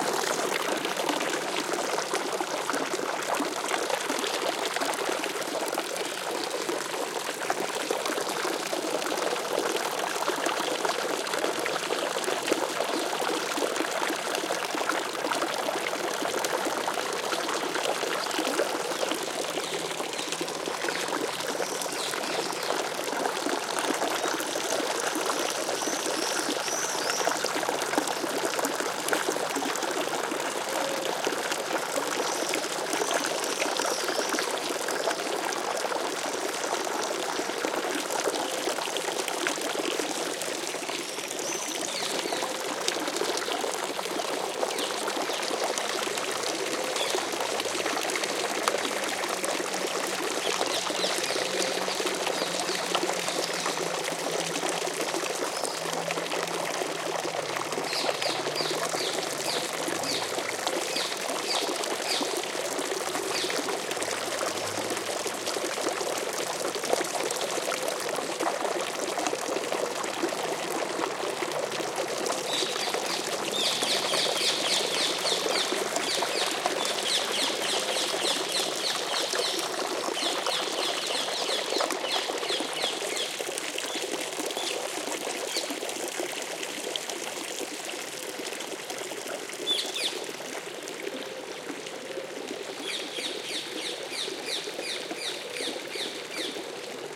20080504.fountain.medium
medium-distance take of water (from a fountain) splashing. Swiftts and parrots screeching in background. Shure WL183 pair into Fel preamp, Edirol R09 recorder
ambiance, field-recording, fountain, park, water